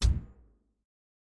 Single bullet fired from gun.
single-bullet-shot, gun-fire